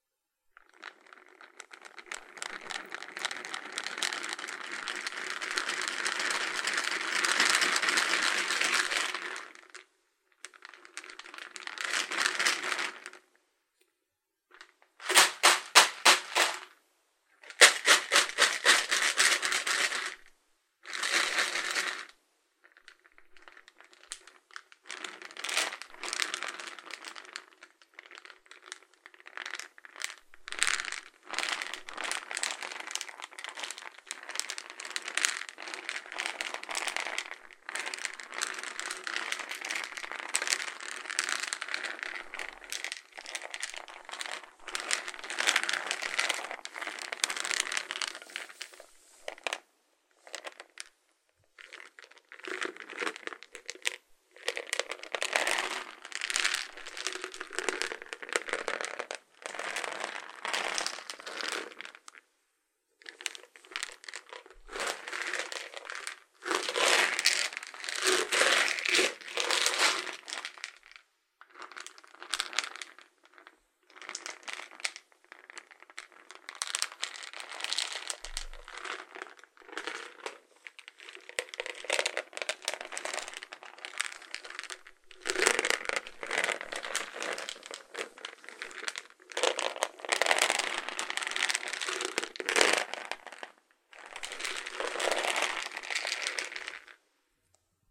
hazelnuts in a cylindric plastic box
Hazelnuts shaking and rolling in a cylindric plastic box in a lot of ways.
Recorded by Sony Xperia C5305.
shake, house-recording, plastic, nuts, rolling, hazelnuts, shaking, roll, box